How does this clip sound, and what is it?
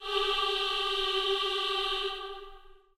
CHOIR OOOH
choral, singing, gospel